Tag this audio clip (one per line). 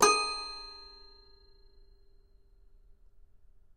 sample
keyboard
samples
note
toy
piano
toypiano
instrument